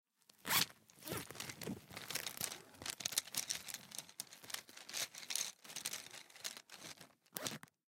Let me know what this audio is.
School Case with Pencils 02
Opening a school case, rustling of pencils inside the case and closing it.
zipper, school, 5naudio17, open, pencils, case, rustle